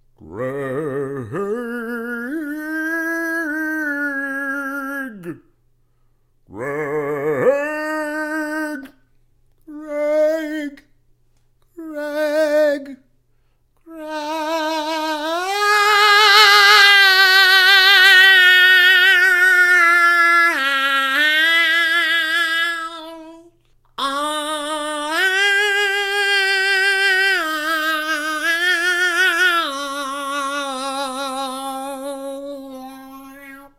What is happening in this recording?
Deadman laying down some operatic phrases about obscure people from decades ago.
deadman, male, vocal